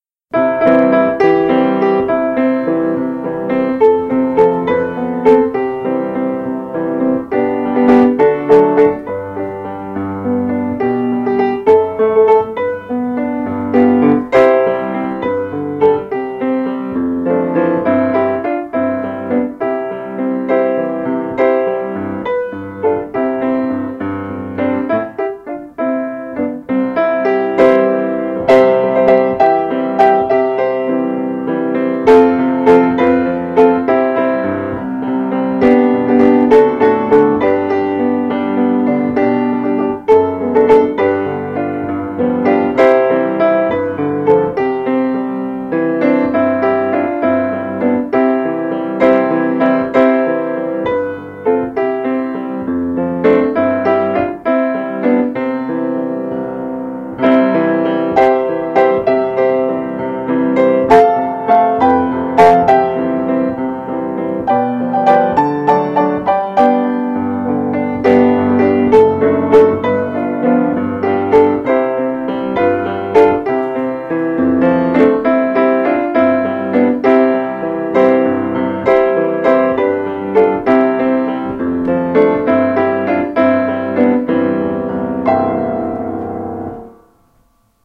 Eaton Boating Song recorded on a Grand Piano at the Stables Theatre for the production of Three men in a Boat